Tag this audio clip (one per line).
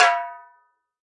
velocity
multisample
tom
drum